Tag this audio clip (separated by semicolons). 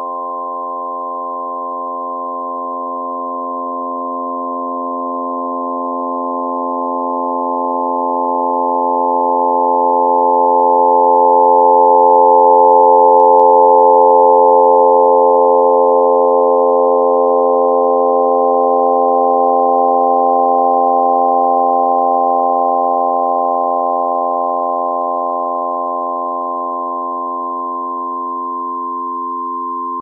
image; processed; synthesized; Thalamus-Lab